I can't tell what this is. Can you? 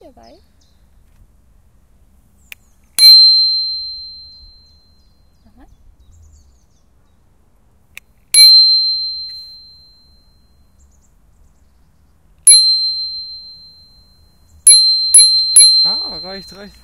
Anika's Bycicle Bell
one, fahrrad, Ping, ring, shots, high, loud, ass, atmosphere, outdoor, shit, klingel